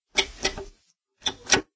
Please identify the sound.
A button of my Gradient Spect 87 being press, it's a old spring driven button.